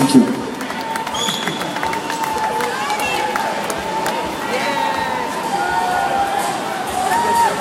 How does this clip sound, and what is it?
FREMONT END OF PERFORMANCE
A performer saying "thank you", and a crowd cheering in a background. Recorded with an iPhone.
cheer, cheering, crowd, fremont, thank-you, vegas